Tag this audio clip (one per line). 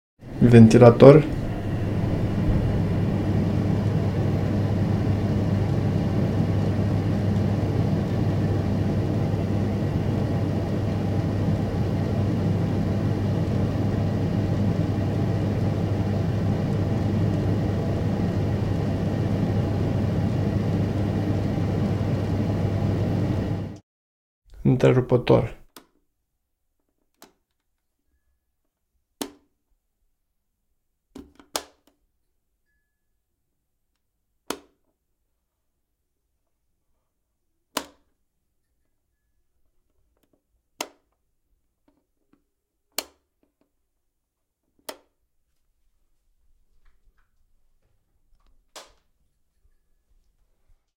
fan
bathroom
ventilation